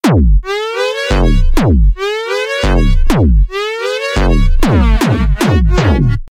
Gravity Drop
pad, synth